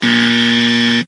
Wrong Answer Buzzer